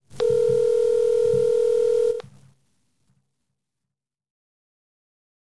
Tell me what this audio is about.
phone-ring
Ringing sound from an iPhone speaker while a call is being made. One ring.